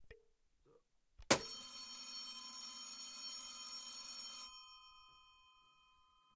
Omas Telefon 02
Recording of an old telephone I found at my grandmothers house. Its from about 1920-1930 and was recorded with a Tascam DR-40.
foley, Telefon, ring, bell, Telephone, old, Phone